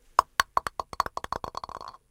This is a lotto ball sound. Recorded with a plastic marble and a wine cup, muting the cup resonance with the hand. Neumann U 87.
ball, bola, bonoloto, loteria, lotto, ping, pong